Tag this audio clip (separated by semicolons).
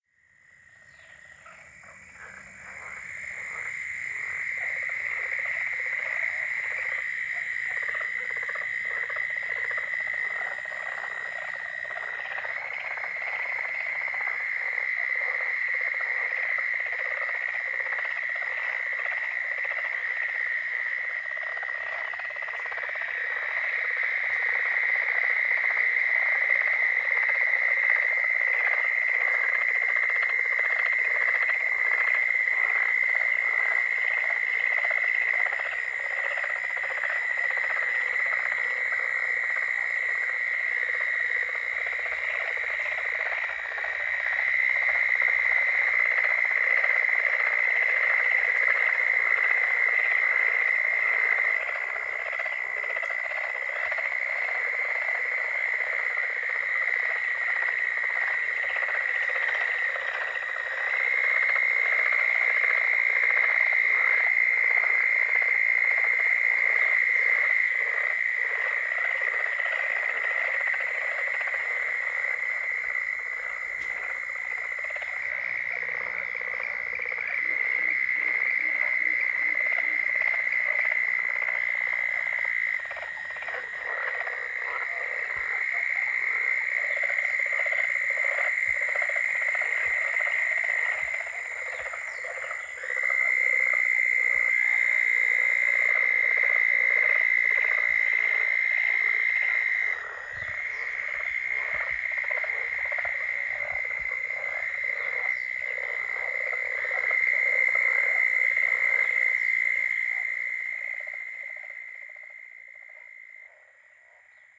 Frogs; marsh; peepers; pond; Spring; Wall-O-Frogs